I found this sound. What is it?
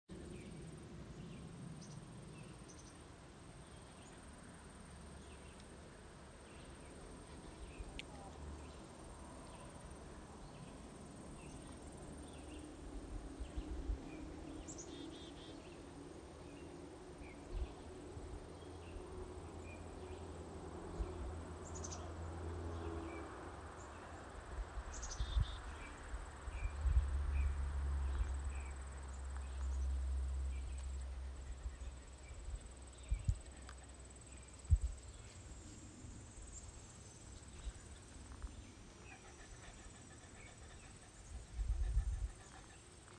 Andes NY Hike
Taken using Voice Record Pro on an iPhone 11 Pro. During a hike in rural New York State on a warm summer morning. Not completely out of the way, so occasional vehicles may be heard.
ambiance, ambience, ambient, birds, countryside, field-recording, nature, rural, summer